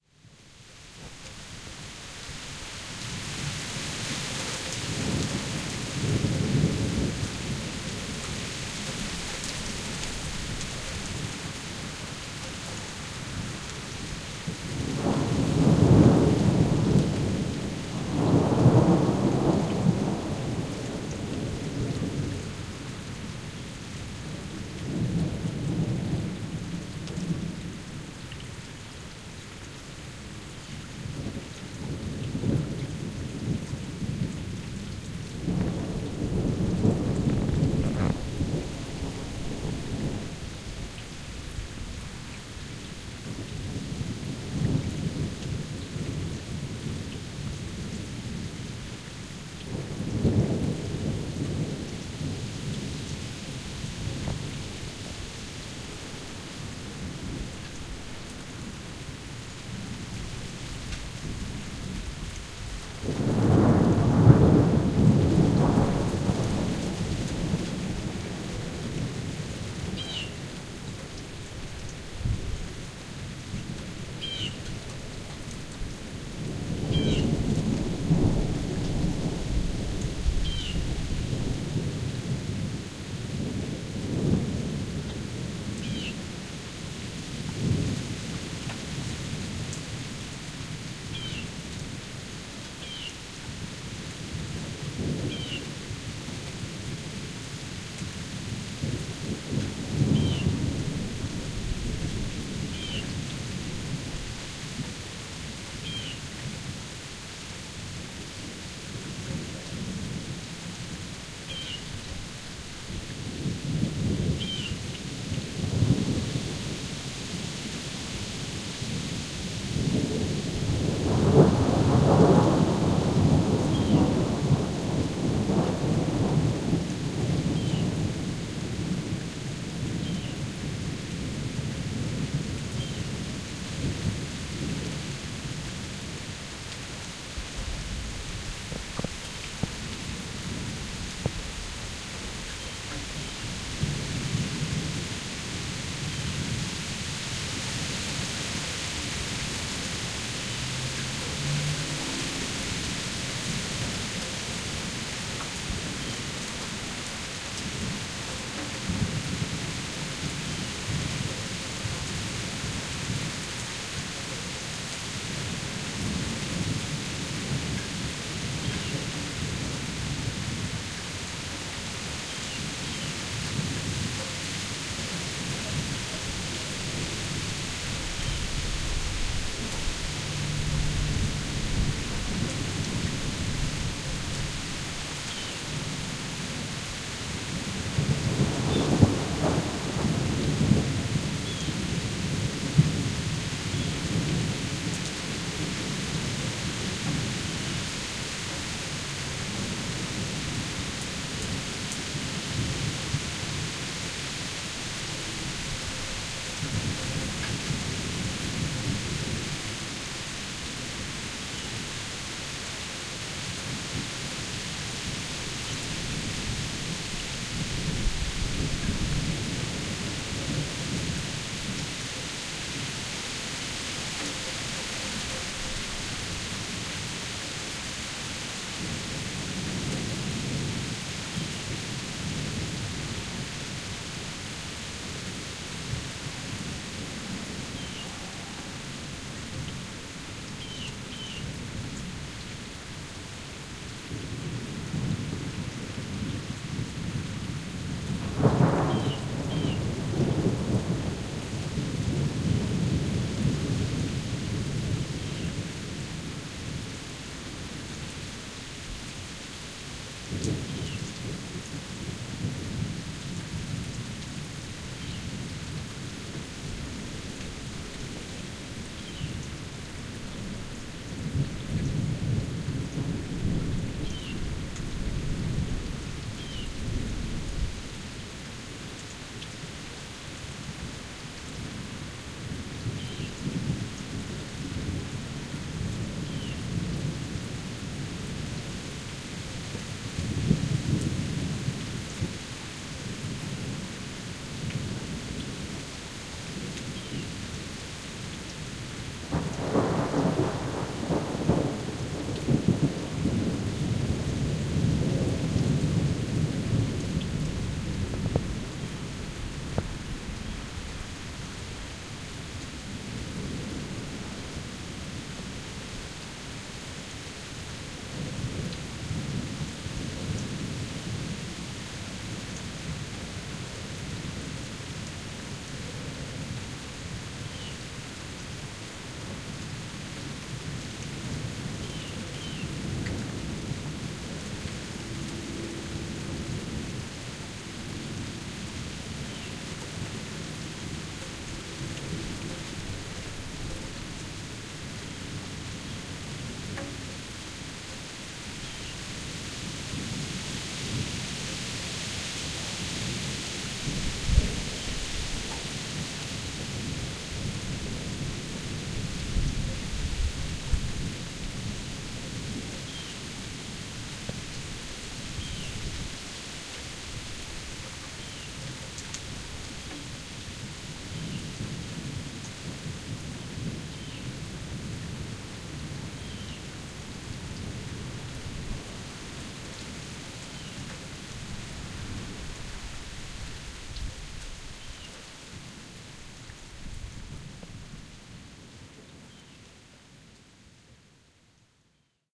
July Thundershower
6-minute clip of a thunderstorm with sweeping rainfall in the grass and trees of my back yard in northern Colorado. Sounds of annoyed crows and grackles can be heard periodically in the distance, and the ambient sound of water through the gutters and downspouts is also prevalent.
Recorded with a Tascam DR-60D and two Behringer XM1800S dynamic mics. Post processed with amplification and mid eq boost in Audacity.
field-recording, nature, rain, storm, thunder, thunderstorm, weather, wind